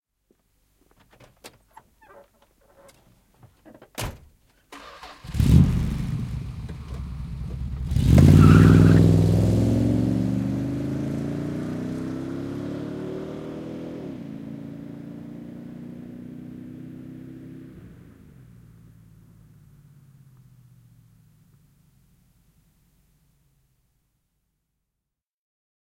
Henkilöauto, lähtö, ulko / A car, door, start, pulling away with tyres screeching, exterior, Dodge Charger 471 V8
Dodge Charger 471 V8. Ovi, käynnistys, lähtö renkaat ulvoen, etääntyy. Ulko.
Paikka/Place: Suomi / Finland / Vihti
Aika/Date: 09.09.1979
Auto, Finland, Renkaat, Autot, Yle, Soundfx, Cars, Autoilu, Start, Tyres, Screech, Suomi, Exterior, Tehosteet, Car, Finnish-Broadcasting-Company, Field-Recording, Yleisradio